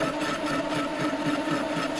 recordings from my garage.
industrial, metal, tools, machine